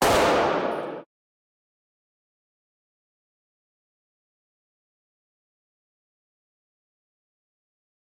Lo Fi 12-bit Nova Gun Rounds Gunshots Shooter Shells Rifle Old Converter Etx - Nova Sound
Explosive, Arms, Firearms, 5, Nova, 8-bit, Cyber, Weapons, Sound, Gunner, Digi, Shooter, 7, Dark, 8bit, 8, Guns, Shoot, Shooting, bit, Digital, bits, Shot, Explosion, Bombs